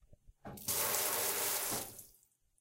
That's the sound of the whater falling from a flexo griffin in a butcher. Recorded with a Zoom H2.